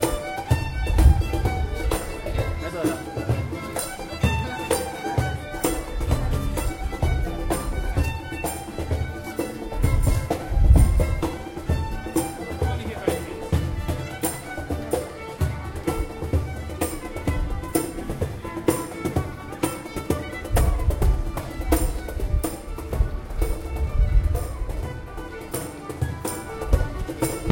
medieval sounding music edinburgh
Street band in old city Edinburgh Scotland. Very medieval sounding.
city, field-recording, soundscape, music, street, ambience, people